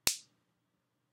Snap of fingers
click, snap